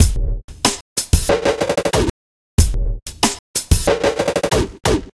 Drum Synth
Sample processing with drums and synths
Synth, Sampled, Loop, Drum